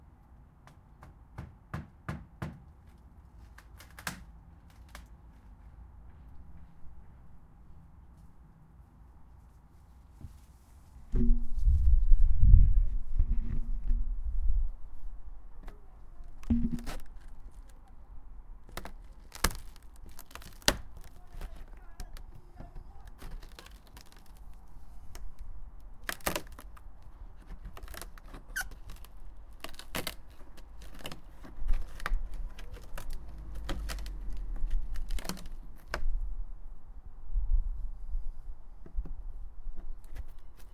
Tearing rotten wood
This is me in the garden tearing away rotten wood from my fence before fitting in new wood pieces.
Recorded with a Zoom H1.
rotten, tearing, breaking, rotten-wood, squeaking, creaking, cracking, wood, snapping, destroying